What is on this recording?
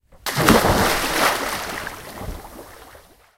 Splash, Jumping, C
Raw audio of someone jumping into a swimming pool.
An example of how you might credit is by putting this in the description/credits:
The sound was recorded using a "H1 Zoom recorder" on 28th July 2016.
jump, jumping, pool, splash, splashing, splosh, swimming